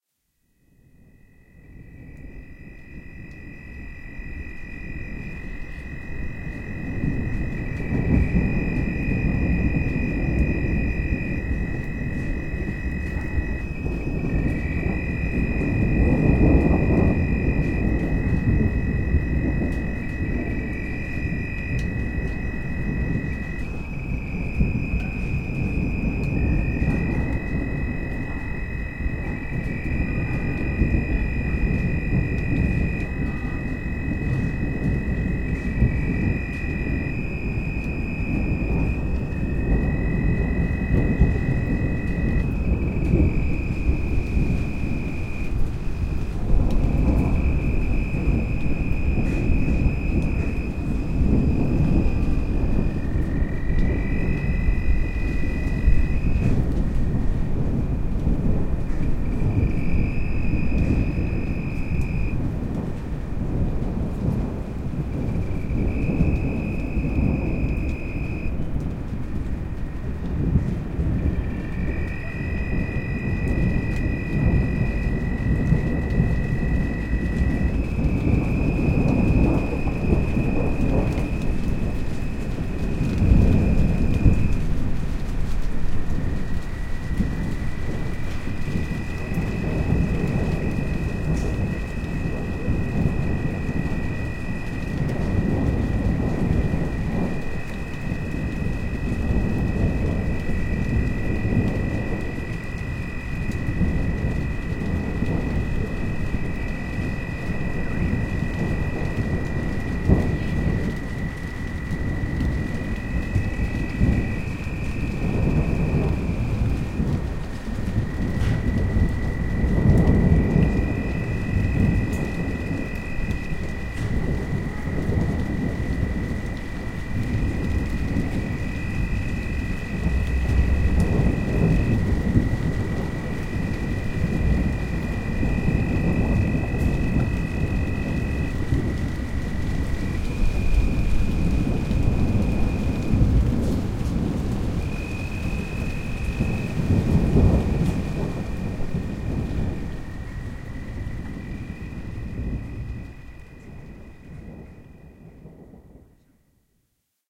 El Altar
Dia de los muertos, Tlaxcala. Tormenta llegando en la noche, grillos.
"Toussaint" mexicaine, Jour des Morts à Tlaxcala, Mexico. Orages au loin, grillons, ambiance de nuit.
Grabado con Sennheiser MKH30/50, Decoded MS
grillos, orage, grillons, dia-de-los-muertos, noche, nuit, tormenta, altar, mexico, thunder, night, tlaxcala, field-recording